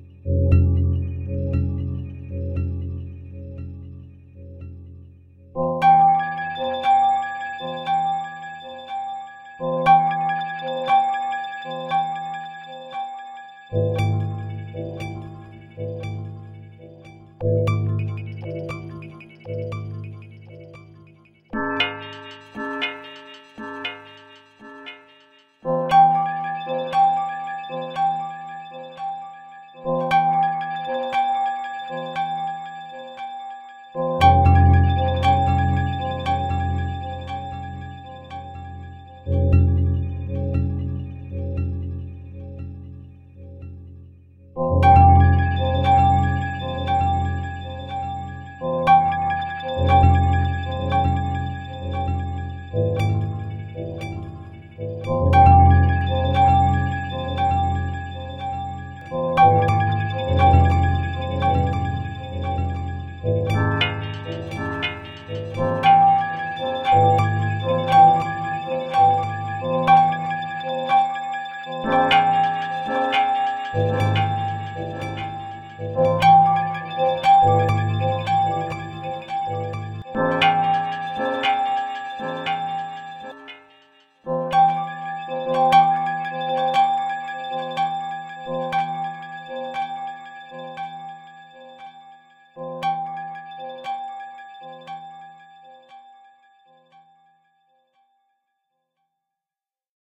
Doppler Bells
This is ERH's Bell CBN 163514 on four tracks layered and staggered for echoes, a touch of Doppler, a little syncopation and a touch of weirdness.
fi, science, space, dysrhythmic, Doppler, effect, weird